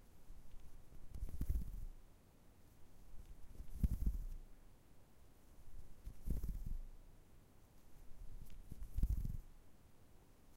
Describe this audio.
4 stereo recordings of a diverging ruffling/moving/tripping noise with stereo effect